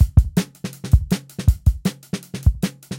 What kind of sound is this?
160 bpm drum loop based on Amen Brothers brake.
Sean Smith, Dominic Smith, Joe Dudley, Kaleigh Miles, Alex Hughes + Alistair Beecham.